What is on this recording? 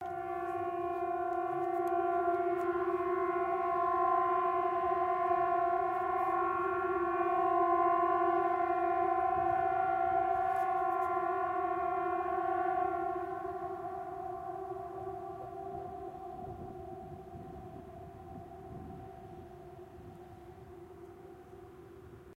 A Warning Siren test in Trondheim Norway January 2015. Recorded on a Roland R-05.